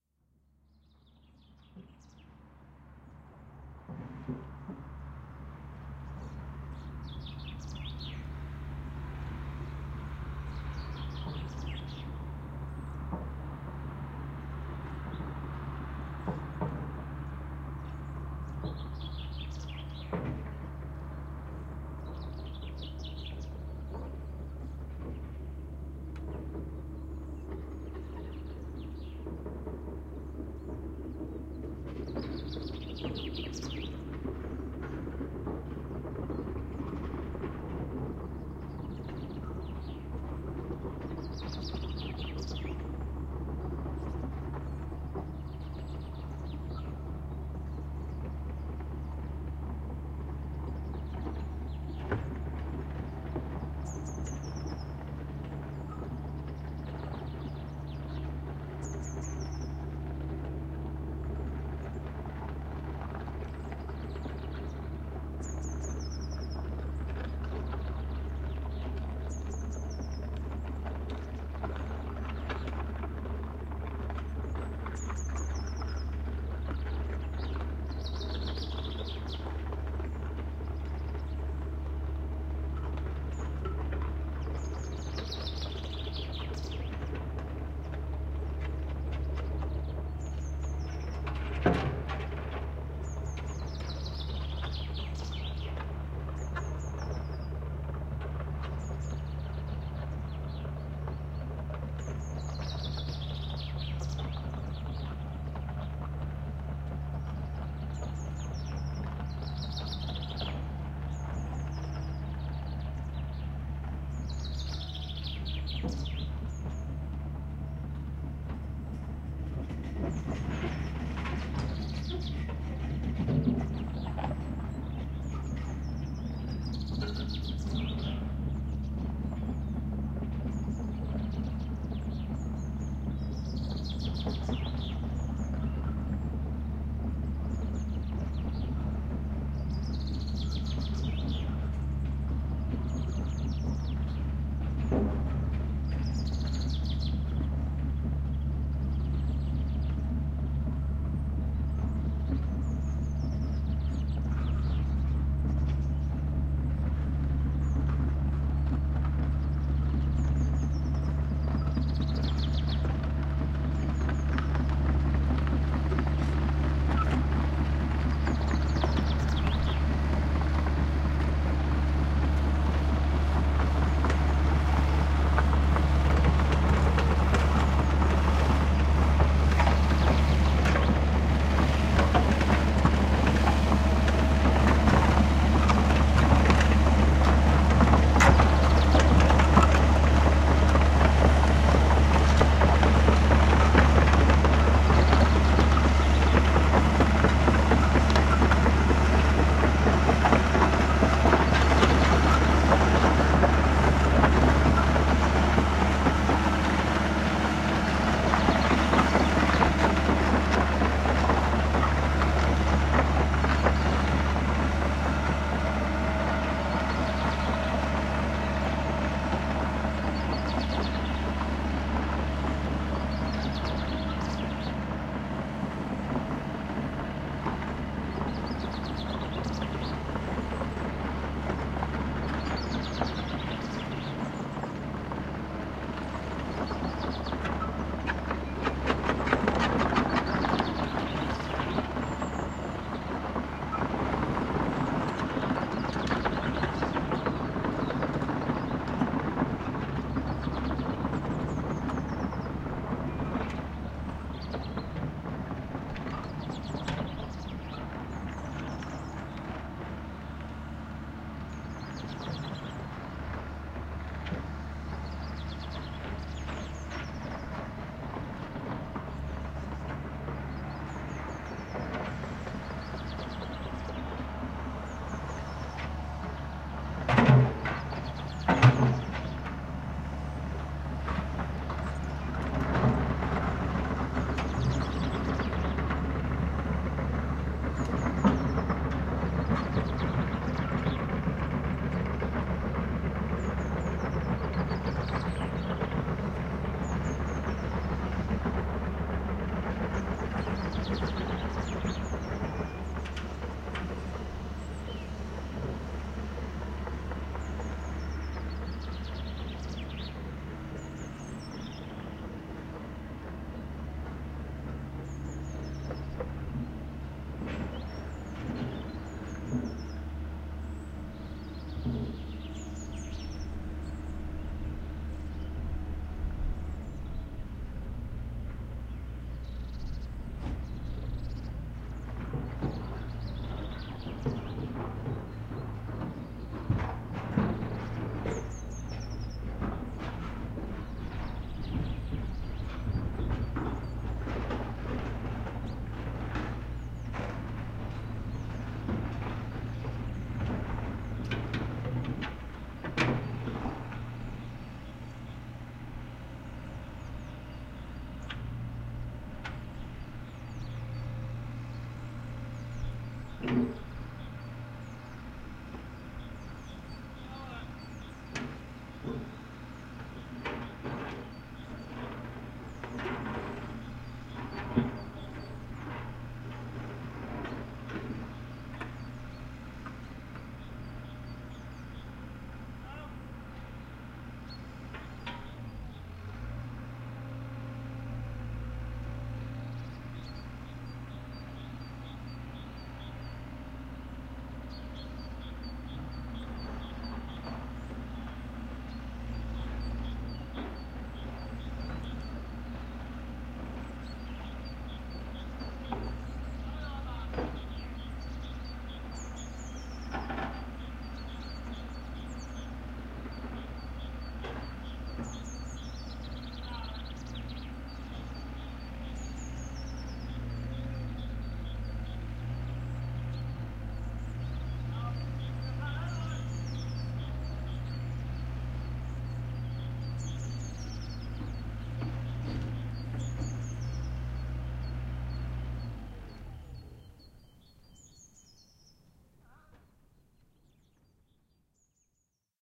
Excavator Departing
A stereo field-recording of a steel tracked 6 ton excavator traveling along a gravelly farm track whilst dangling a selection of buckets. From left to right (below the mics throughout), at 4:00 it turns to it's left away from the mics 4:40 a 90° left on to tarmac before loading on a low loader.Rode NT-4 > Fel battery pre-amp > Zoom H2 line-in.